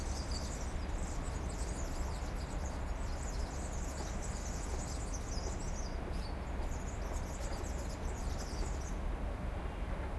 high freq bird
some bird with a high pitched voice. stereo condenser mic
birds, field-recording